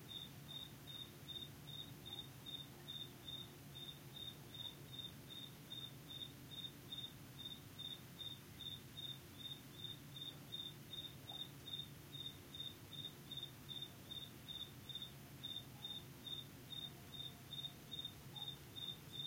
Night Ambience
Outdoor nighttime recording, quiet noise and crickets.
crickets, atmos, ambiance, atmosphere, nature, background-sound, field-recording, ambient, ambience, outdoor, night, background, soundscape